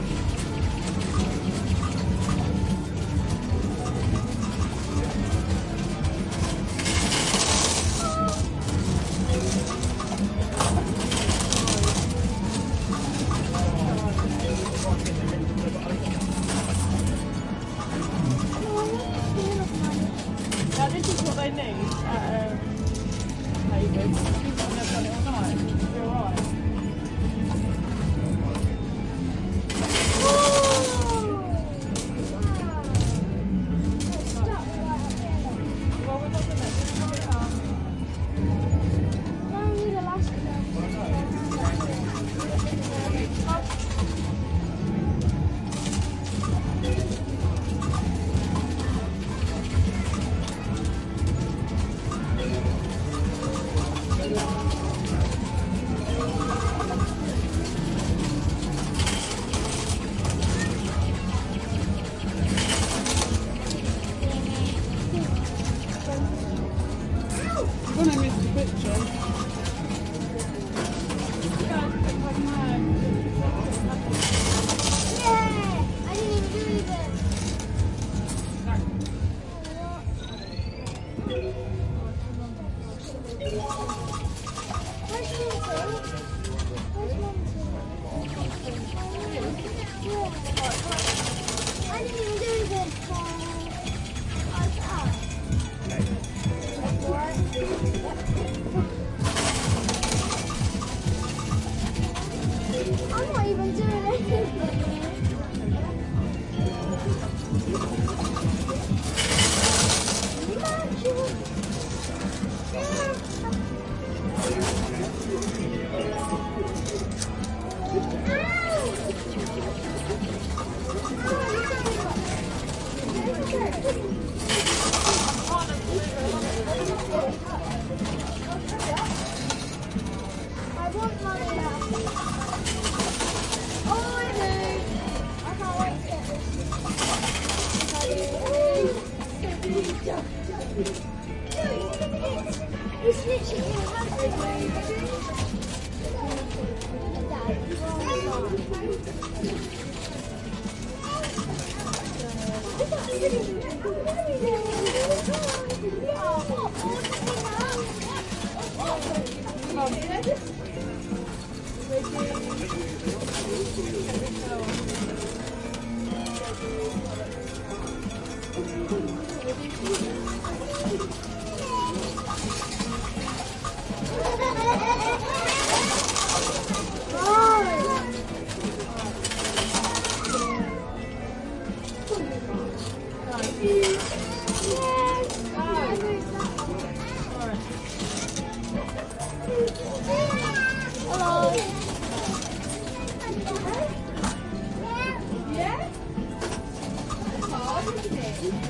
405 Pac Man Ball arcade coins falling
Arcade penny falls coins falling
arcade, coin, coins, drop, dropping, falls, game, great, money, nnsac, noise, penny, retro-game, space-gun, yarmouth